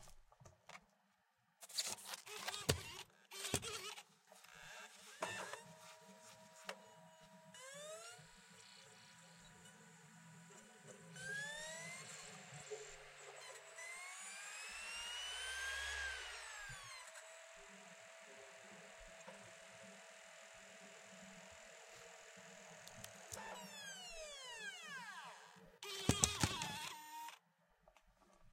cd in the computer
computer, machine, cd